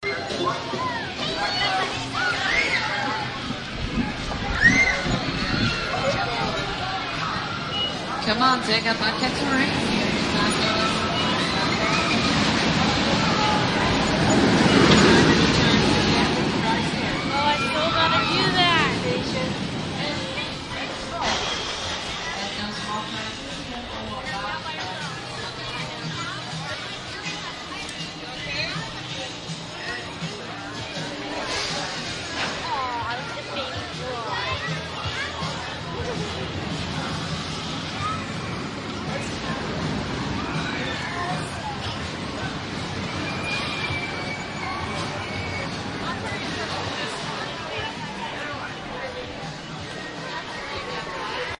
wildwood moreyspierthursday
Thursday on Morey's Pier in Wildwood, NJ recorded with DS-40 and edited in Wavosaur.
ambiance, amusement, field-recording